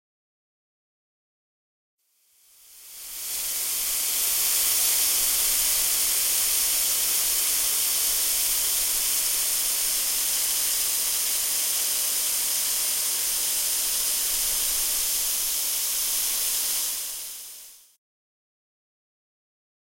7-1 Iron close long
CZ, Czech, iron, Panska, water